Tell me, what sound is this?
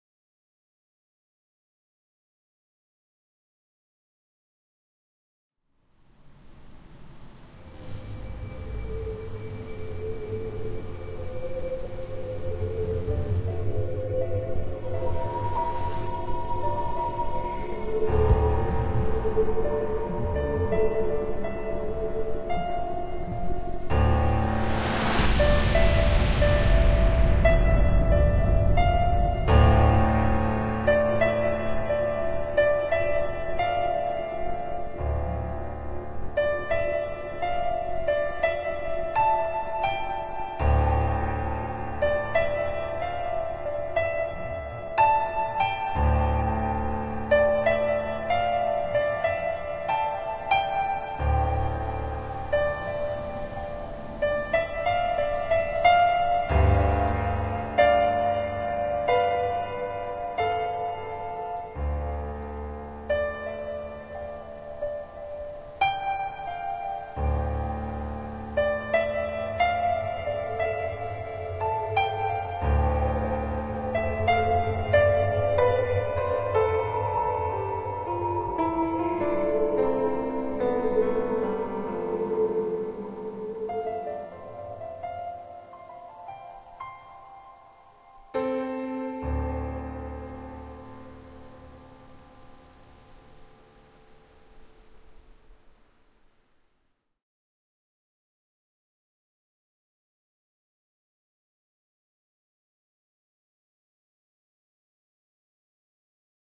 Cinematic elements with a piano melody